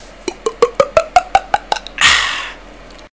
drinking water
made this sound with my tongue
dare-19, water, drinking